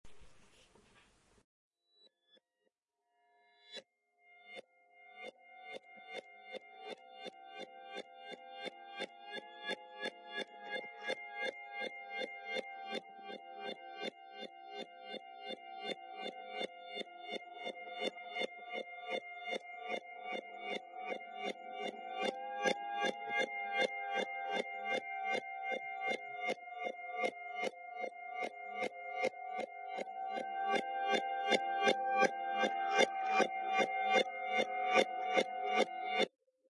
Stereo recording of strings plucked behind the nut.Recorded using an electret microphone on HI-MD.Has then been processed and reversed.
behind, guitar, nut, string